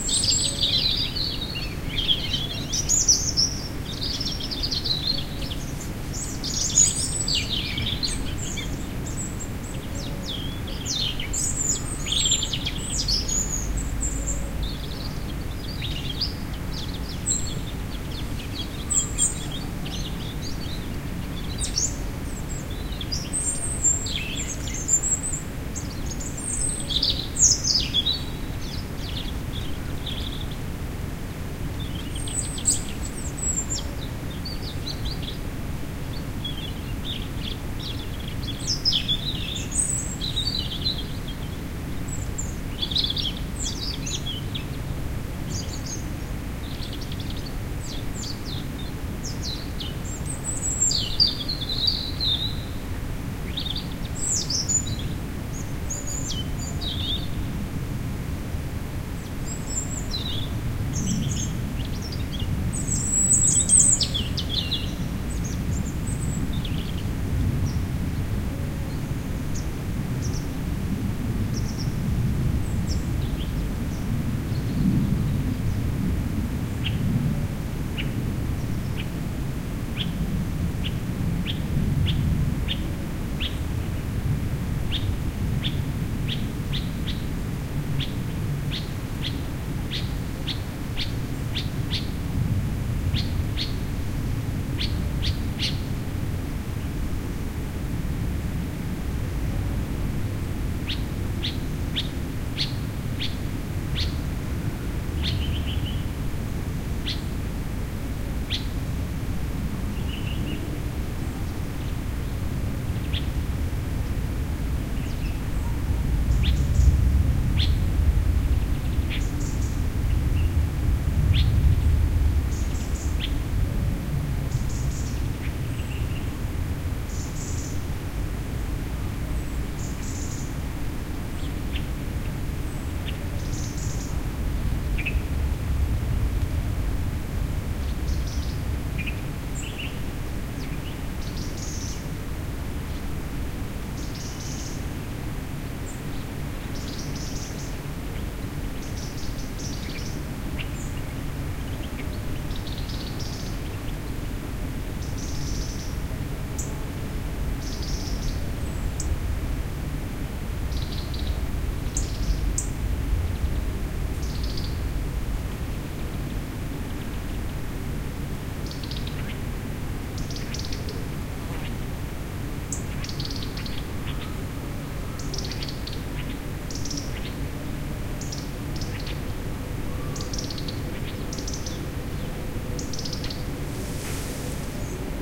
Bird song ( erithacus rubecula ) recorded with ZoomH2in garden of Rixos Premium hotel in Belek ,Turkey. October2008